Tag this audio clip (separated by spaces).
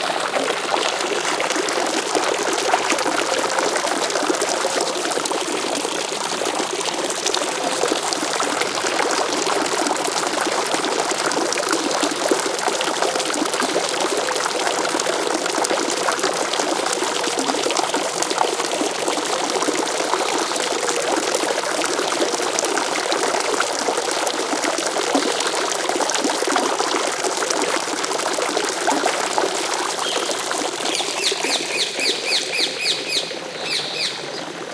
ambiance field-recording fountain park water